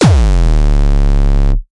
A more-or-less typical gabber kick with a very long decay time; also might be suitable for speedcore and similar genres. Made in FL Studio 11 using Drumaxx and some EQ.
bass-drum, distortion, drum, drum-sample, edm, electronic-dance-music, gabber, hardcore, kick, kick-drum, noisy, single-hit